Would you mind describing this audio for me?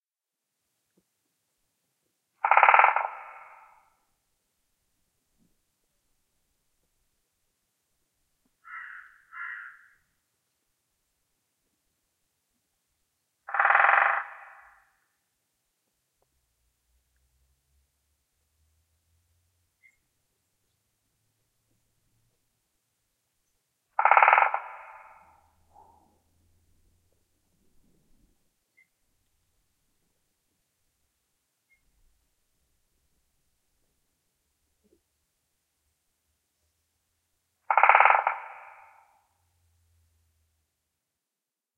Woodpeckers Pecking 4
A stereo field-recording of one (possibly two)Woodpecker drumming a telephone pole. Rode NT-4 > FEL battery pre-amp > Zoom H2 line in.
dendrocops-major, drum, drumming, field-recording, pecking, pole, resonance, stereo, tree, wood, woodpecker, woodpeckers, xy